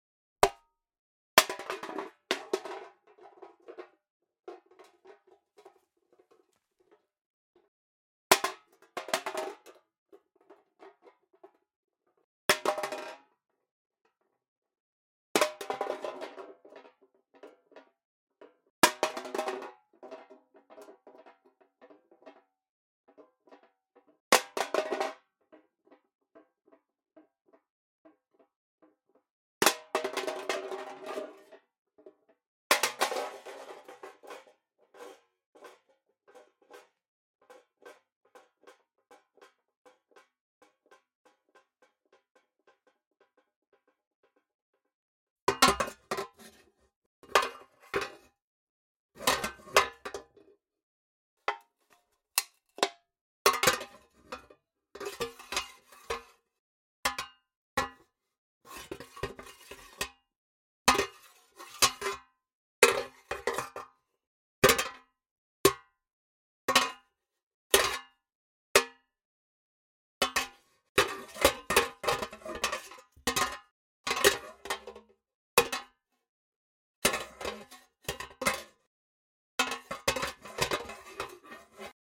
Tin Can 01
A compilation of recordings of a large tin can being handled in a variety of ways.
drop, tin, throw, crush, can